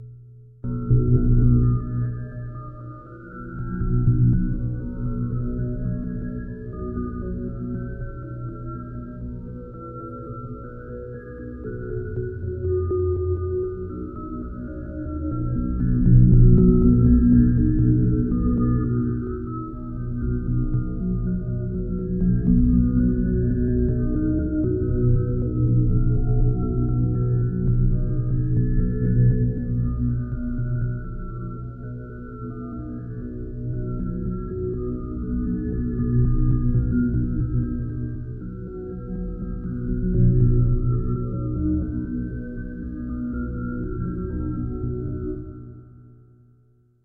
one of the designs made from a source recording of objects being pressed against a spinning bike tire.
Check out the rest of the pack for other sounds made from the bike tire source recording